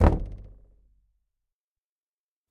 Knocking, tapping, and hitting closed wooden door. Recorded on Zoom ZH1, denoised with iZotope RX.